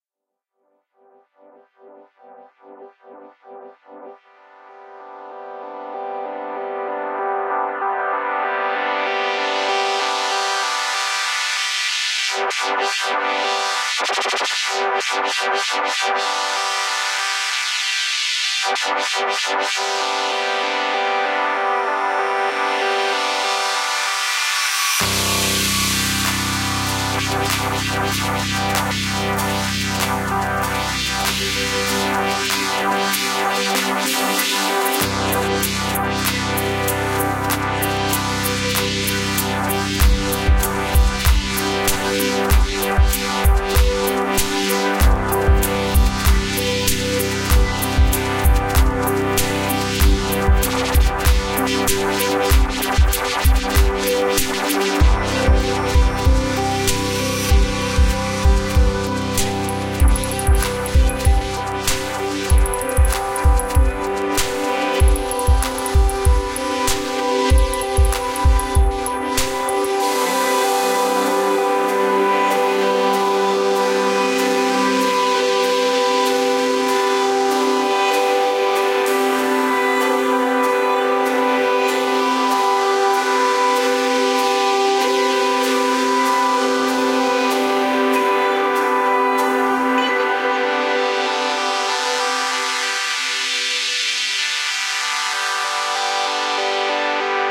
OHC 379 - Triumphant
Experimental Guitar Beat Synth
Beat, Synth